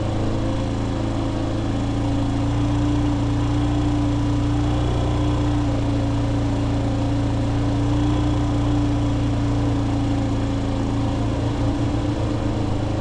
Motorcycle Engine 40km
Emulator, Zuzuki, RPM, Moto, Transportation, Engine, Motorbike-engine, motorcycle, Velocity